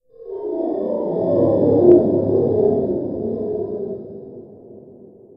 Processed chime glissando
Glissando on LP double-row chime tree. Recorded in my closet on Yamaha AW16-G using a cheap Shure mic. Highly processed with various filters (pitch, time, invert, etc.) in Audacity.